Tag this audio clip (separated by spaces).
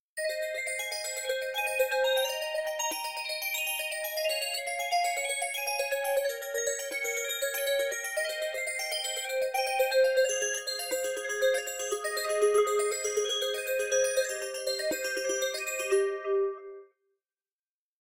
sequence arpeggio melodic bell